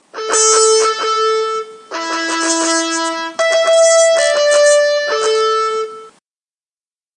I made this sound by generating different sounds of instruments on a virtual keyboard. By testing many things, I finally chose the passage which was the most interesting for me. Then, I added an amplification to my track.
instrumental, sound